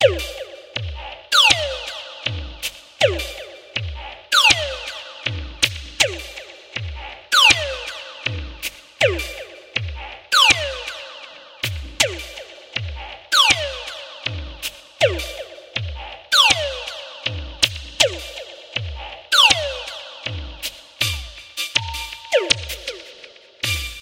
ATTACK LOOPZ 01 is a loop pack created using Waldorf Attack drum VSTi and applying various Guitar Rig 4 (from Native Instruments) effects on the loops. I used the 23rd Century kit to create the loops and created 8 differently sequenced loops at 80BPM of 8 measures 4/4 long. These loops can be used at 80 BPM, 120 BPM or 160 BPM and even 40 BPM. Other measures can also be tried out. The various effects go from reverb over delay and deformations ranging from phasing till heavy distortions.